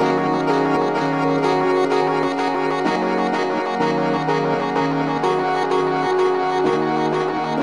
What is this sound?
Some notes played on a Yamaha TX81z, processed with reverse and echo. Loops seamlessly.